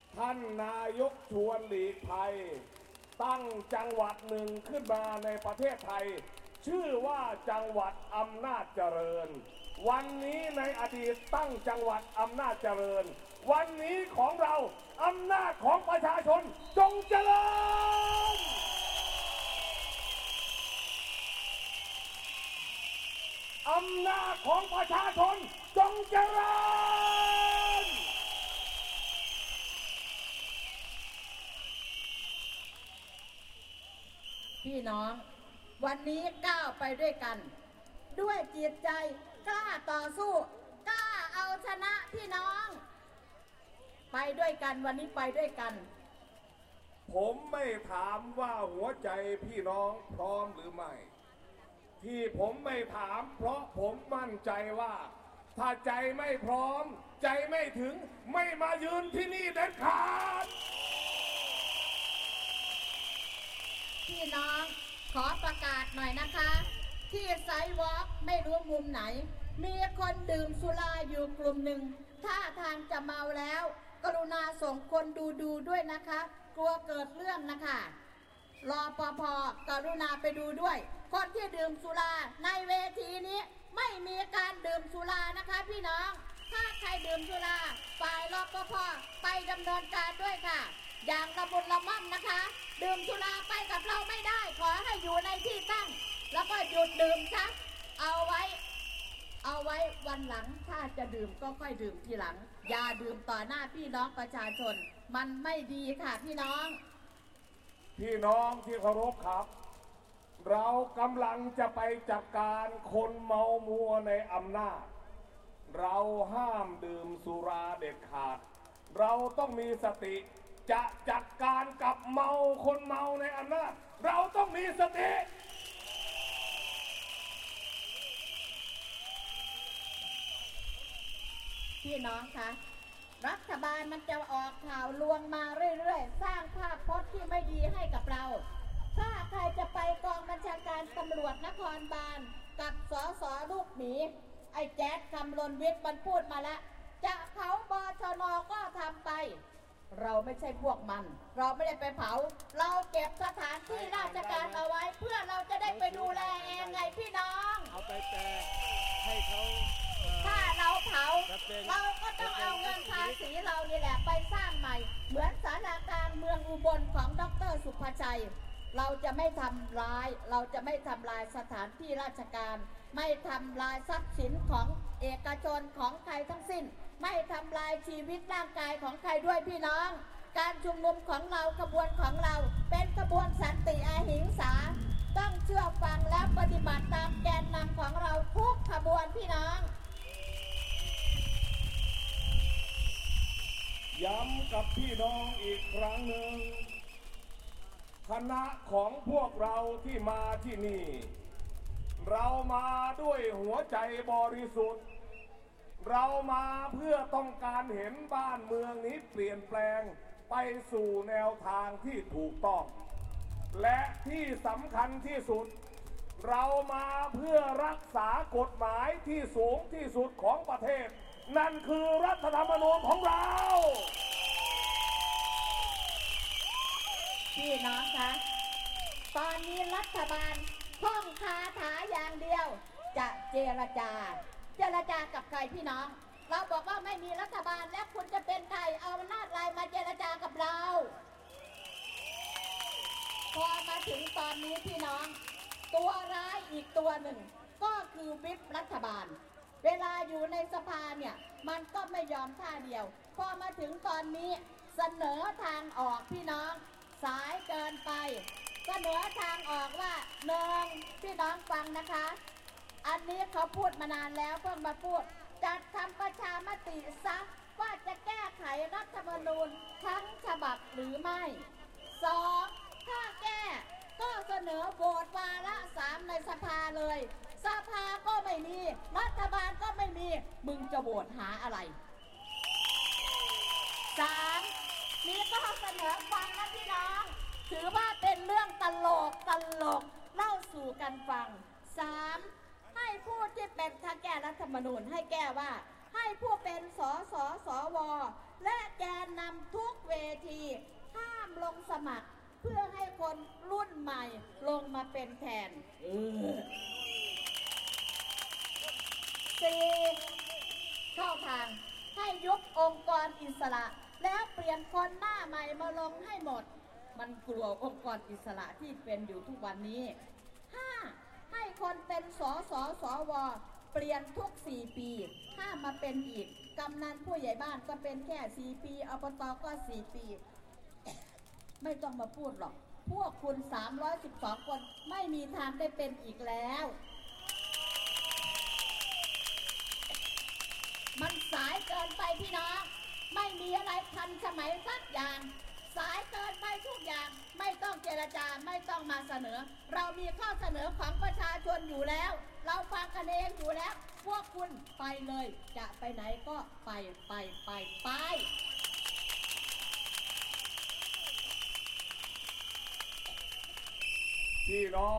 November demonstrations, Bangkok, Thailand
Political speech of the opposition party at the Democracy Monument. Demonstrations of November 2013 in Bangkok.
Recorded the 01/12/2013, at 8:30 am.
anti-governmental, democracy-monument, demonstration, opposition, party, political, protest, speech